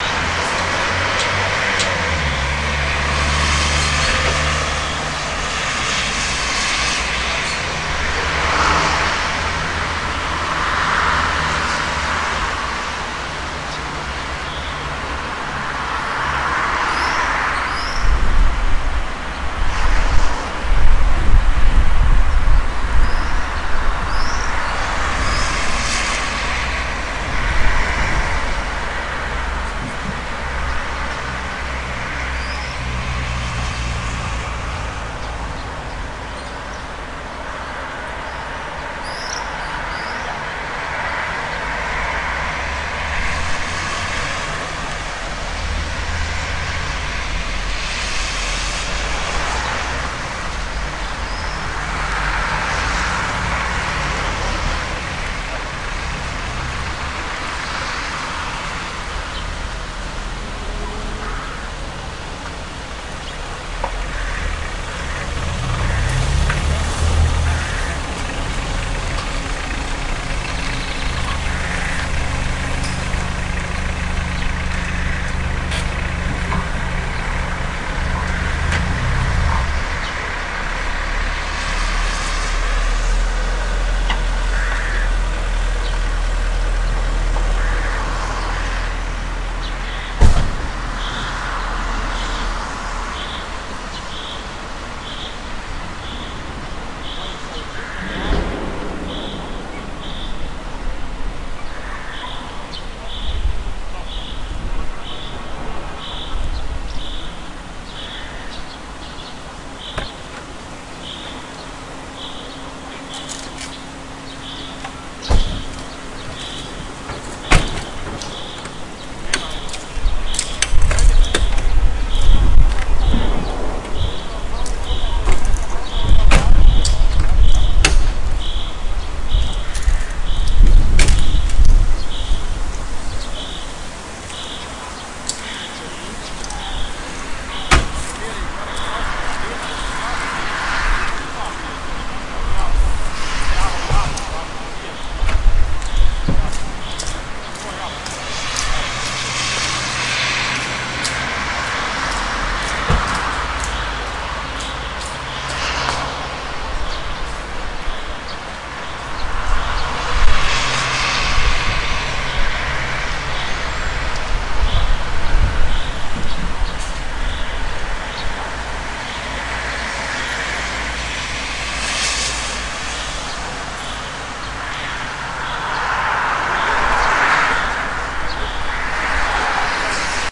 Small city recorded at 11am at wind +-10m/s, 30m from ground.